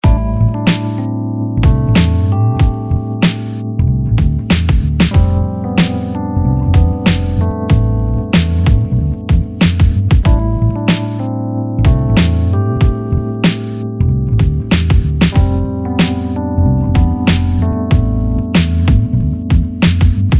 hip hop free 2
rap, rhodes, drum, free, loop, hip-hop